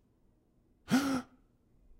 breathing GOOD
Mono recording of heavy breathing and gasping. No processing; this sound was designed as source material for another project.
breath, breathing, gasping, heavy, MTC500-M002-s14, panting